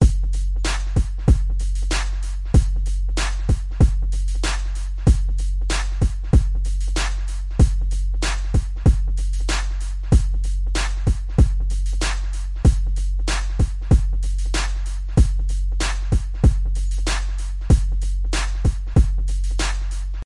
Words Drum Beat 95 bpm
beat, drums, heavy, loop